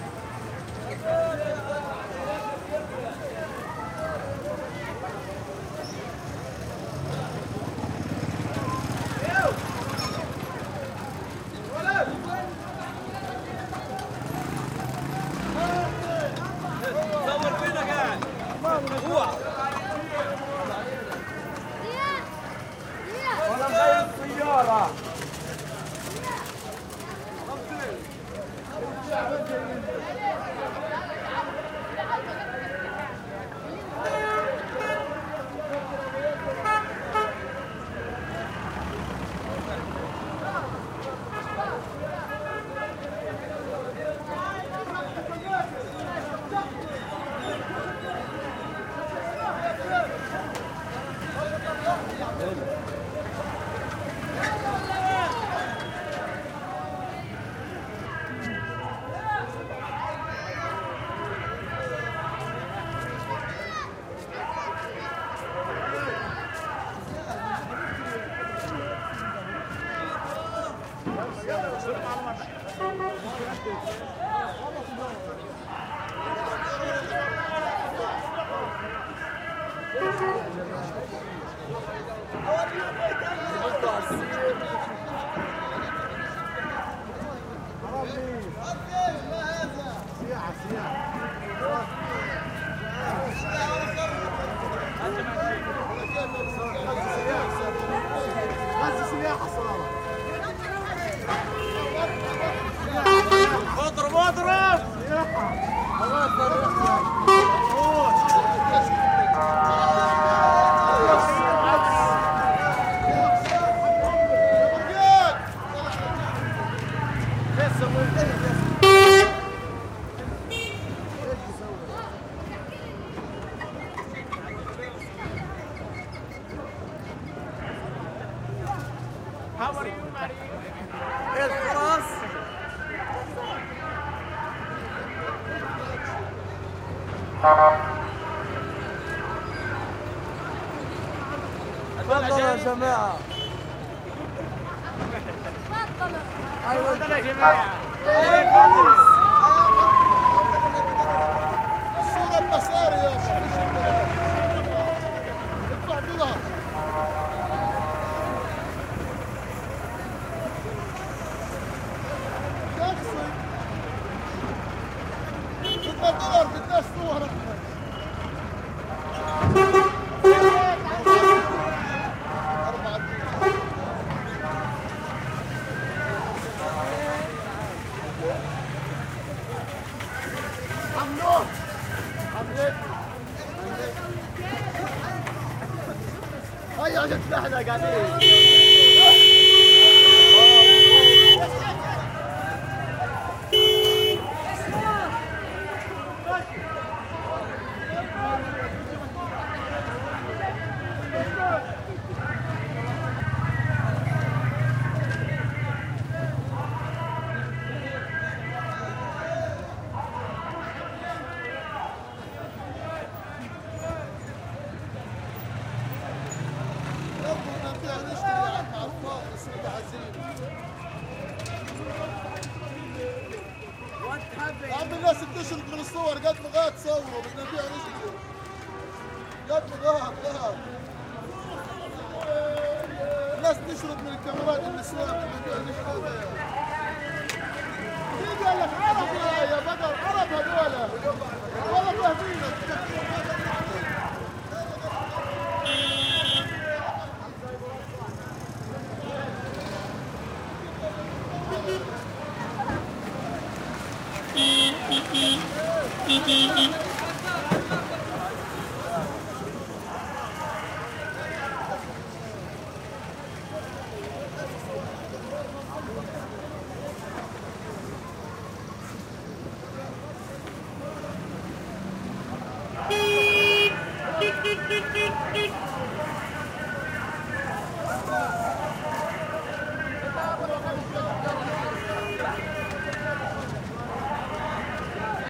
street scene Palestine medium traffic with arabic guys nearby and vendor shouting into mic PA like prison guard +ambulance or police trying to get through siren woops and loud horn honks Gaza 2016
medium, Palestine, vendor